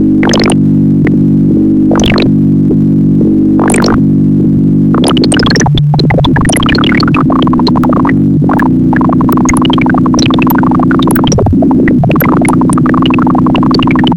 Broken bleeps sound created with a feedback loop in Ableton Live.
Starts rhythmically, but then I move a knob and goes chaotic.
The pack description contains the explanation of how the sounds where created.